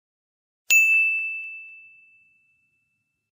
Ding! idea
Sound of a light bulb lighting up, like in the cartoons.
I founded in my animation's school free sound library.
lightbulb, idea, light-bulb, de, someone, Lamparita